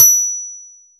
Shiny Blink or Microwave
It´s a little *blink* sound. You can use it for a microwave or for a shiny object.
Created with some settings in Audacity.